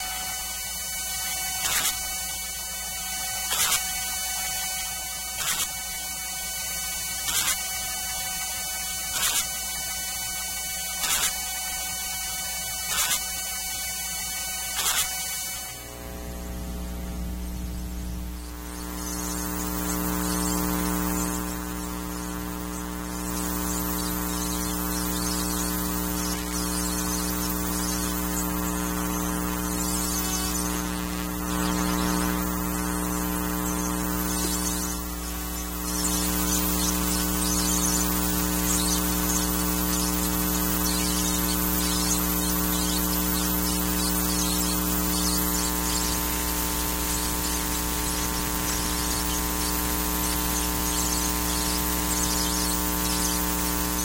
Computer Chirps
An electromagnetic recording of a miscellaneous computer noises. Sounds like chirping.
Recorded using Elektrosluch 3 by LOM instruments into ZOOM H4n.
chirp, computer, electromagnetic, field-recording